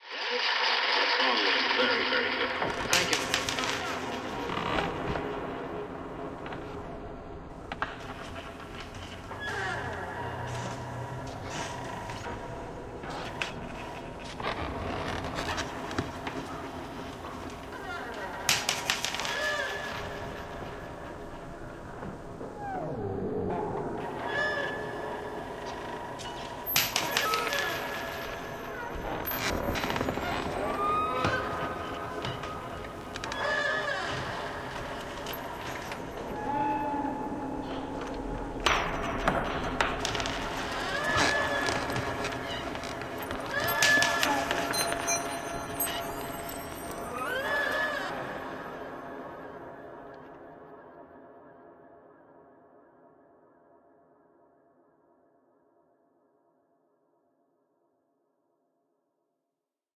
A sound-collage I made 2 years ago. They are recordings made entirely from my old apartment. Not sure how useful this may be, but you are more than welcome to use it.
* I'm open to any requests for certain sounds or music you may need for any of your projects*.
Enjoy!